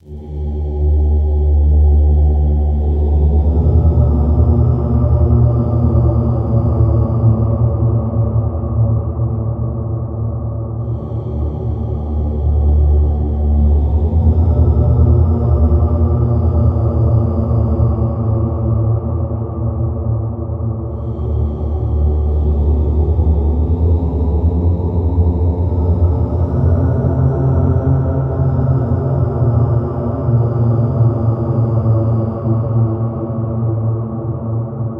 ive used my own voice as a sample and played it on keyboard on ableton :D